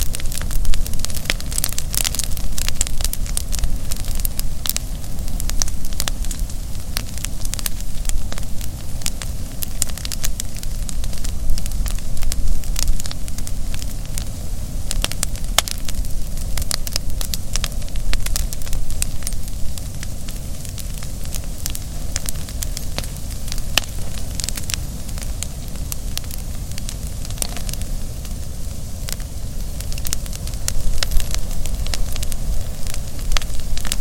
Small Bonfire
Field recording of a mild bonfire about 4' in diameter. Edited to loop.
burn, burning, crackle, fire, fireplace, flame, wood